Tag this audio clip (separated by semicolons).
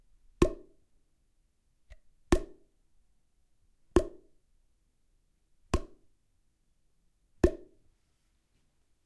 cardboard; effect